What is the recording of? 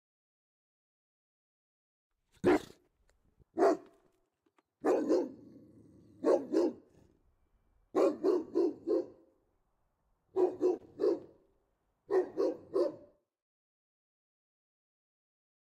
01 Barking b dog
Big dog slow barking. Barking before the fence at passersby.
Recorded with Zoom H6 recorder. The sound wasn't postprocessed.
Recorded close up on windy morning in my garden in Mochov. Suitable for any film.
Barking, Big, CZ, Czech, Dog, Pansk, Panska, Pes, Pet, Pomalu, Slow, Stekani, Velky